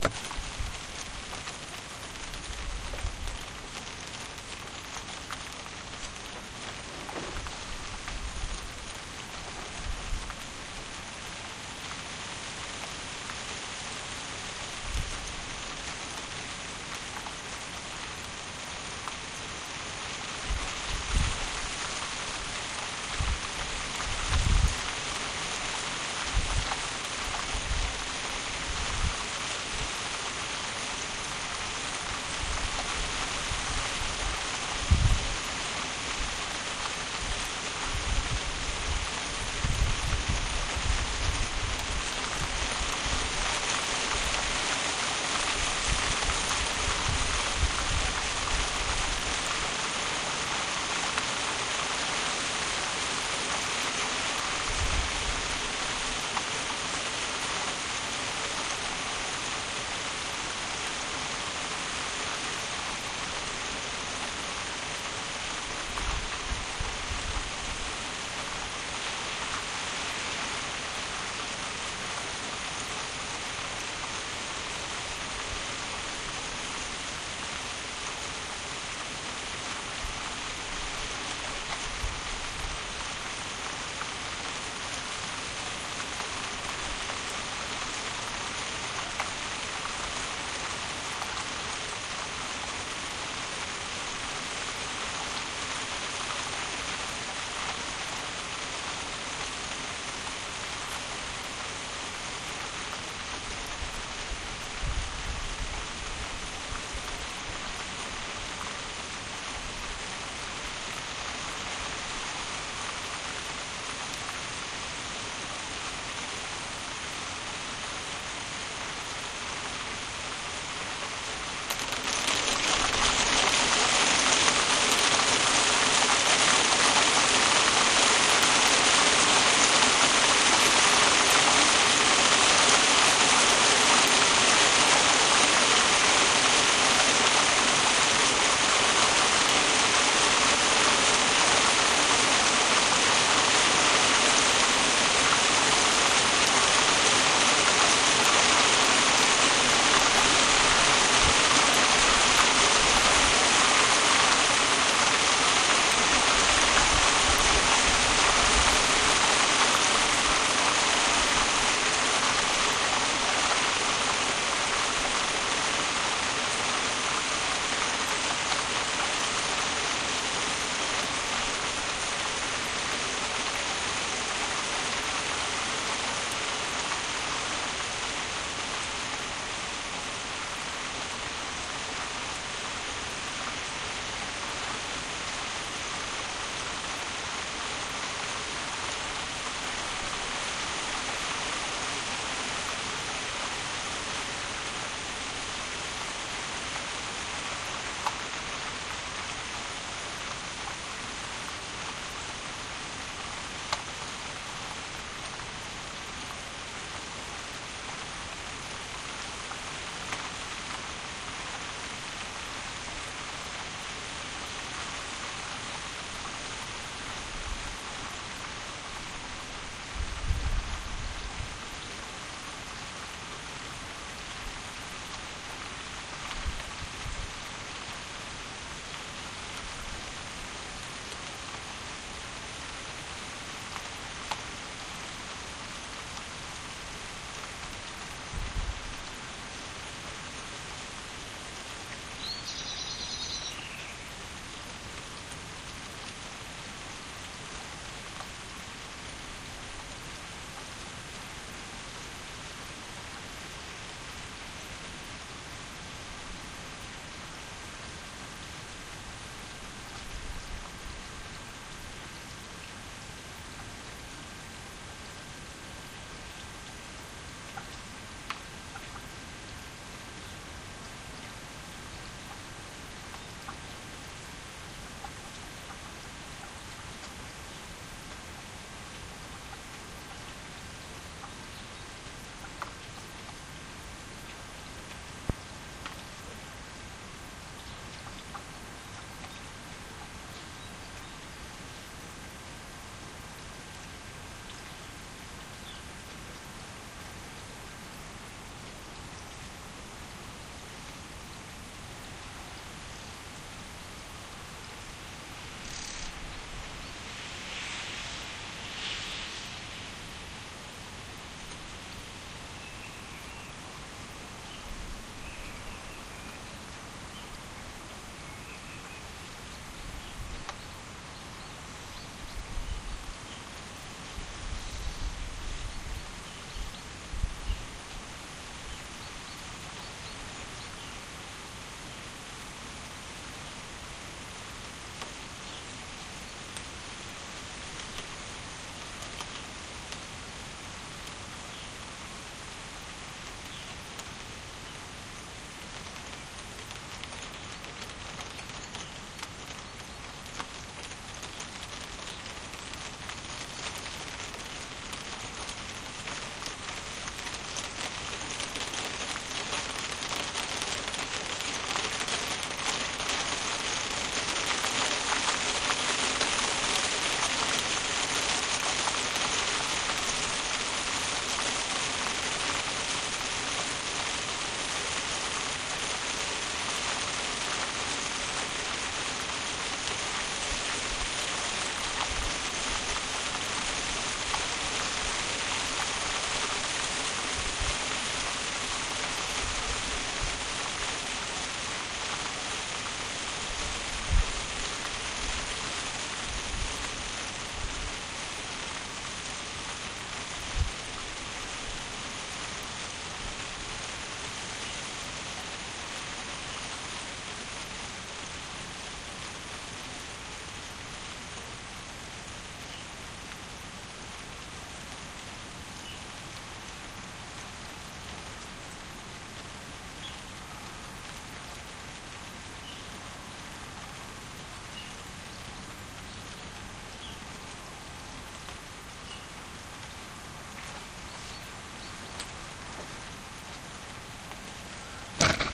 rain rain rain rain - 2008 05 04
Recording I made of a heavy rain shower on the roof of our conservatory on 04 May, 2008. Occasional garden birdsong and street sounds can also be heard in the background.
exterior, heavy-rain, rain, shower, sound-recording, weather